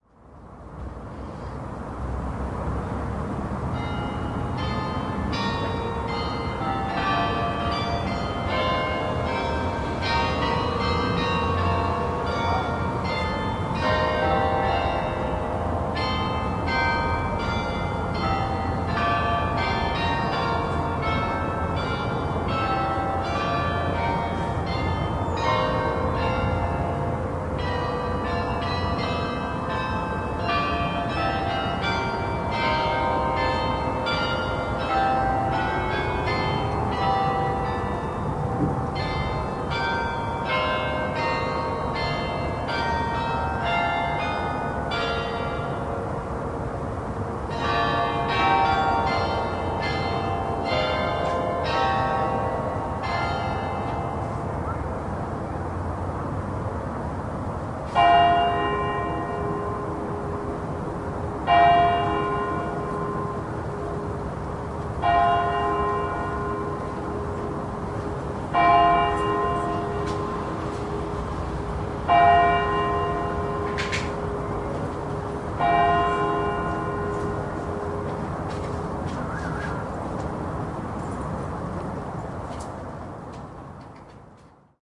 Carillon Jouster Toer
I've recorded this at my balcony at home, what you hear is the carillon in the church here in joure were i live. You also hear a lot of traffic noise coming from a highway nearby.
carillon, church-bells, field-recording